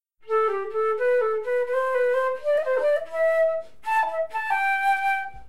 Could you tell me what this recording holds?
excerpt of flute sound
It was analyzed using the STFT, Harmonic plus Residual, and Harmonic plus Stochastic models.